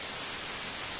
part of drumkit, based on sine & noise